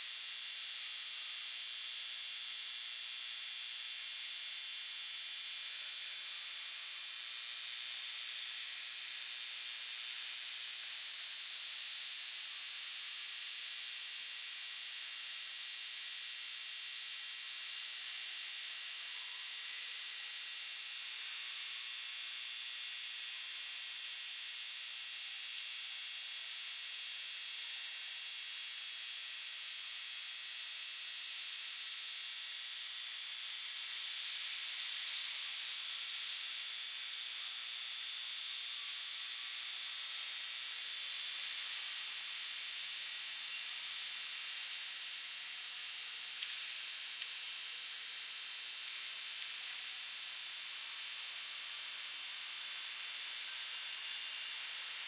metro subway Montreal fluorescent light neon hum buzz lowcut
buzz, fluorescent, light, metro, Montreal, neon, subway